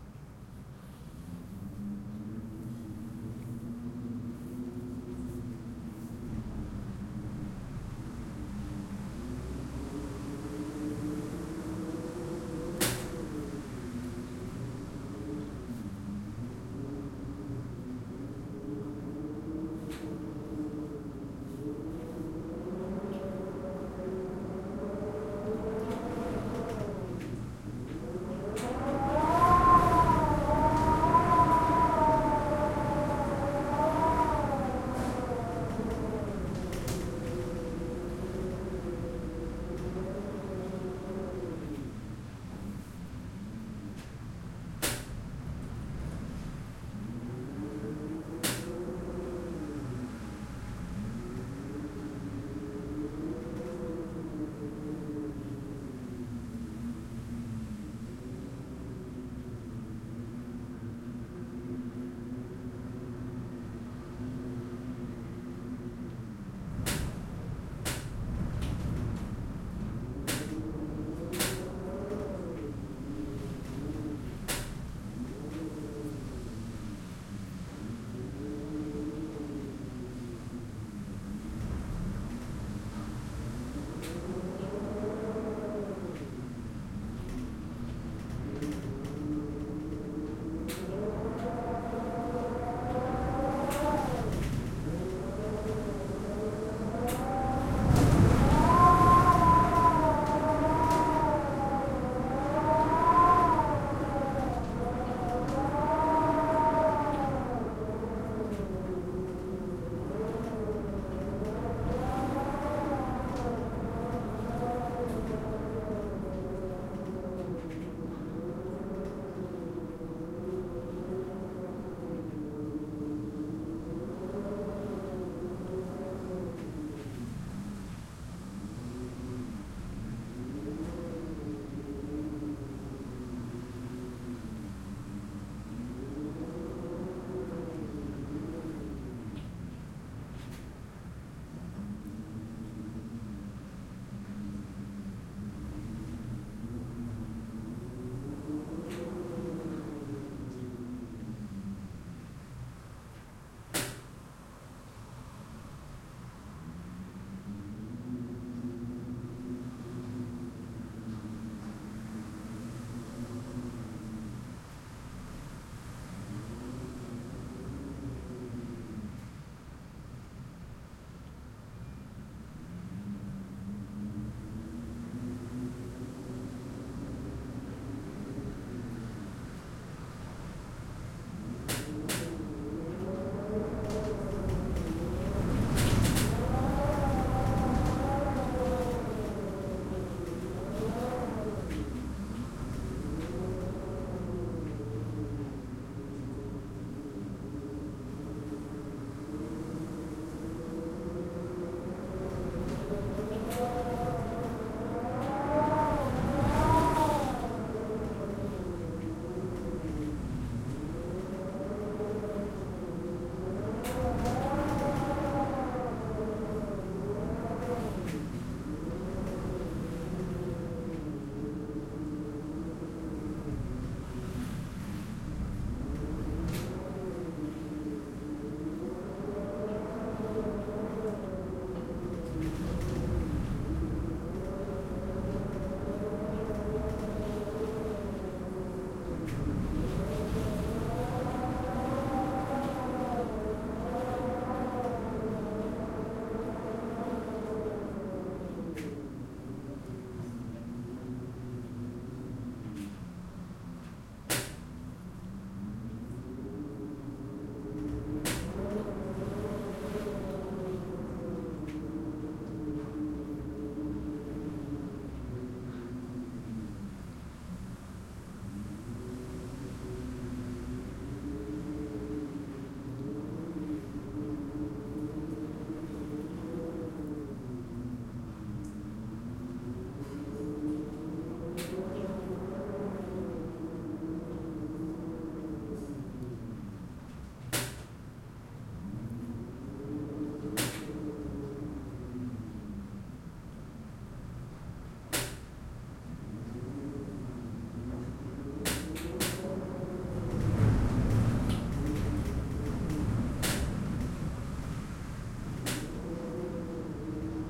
AMB Weather typhoon indoor wind howling windows slapingng 5'07''
Recording with my Zoom H4next.
Typhoon is very common in Taiwan in summer.
H4n, Typhoon